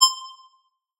blip tail 02
Part of a WIP library for interface sounds. I'm using softsynths and foley recordings.
design, interface, asset